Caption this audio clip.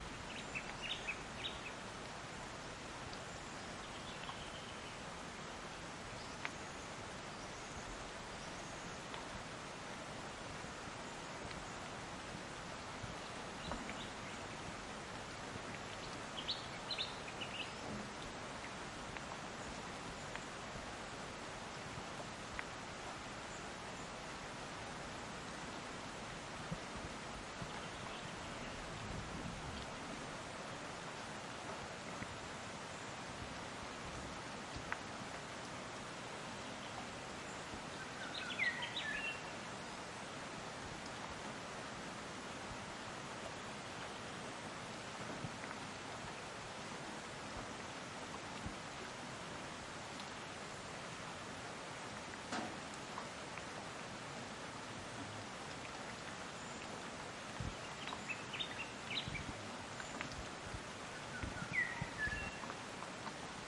Rain in small eucalyptus forest
Medium rain in eucalyptus trees.
Zoom H4